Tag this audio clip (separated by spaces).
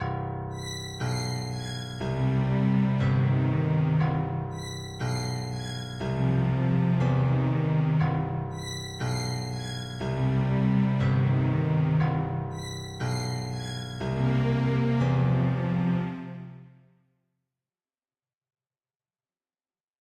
credits digital simple